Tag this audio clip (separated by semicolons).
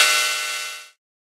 drums; pro; softsynth; hihat